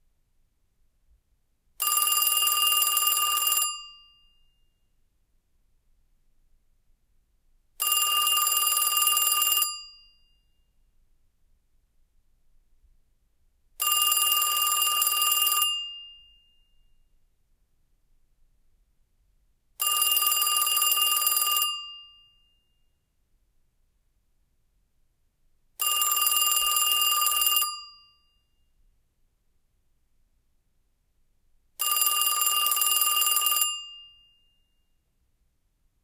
Western Electric Princess Telephone Ringing
A Western Electric “Princess” telephone (model number CS2702BMG, manufactured mid-1984) ringing. Recorded from ~ 1 metre away with a Marantz PMD620. Light background noise reduction has been applied.
Each ring is 6 seconds apart.
bell, ring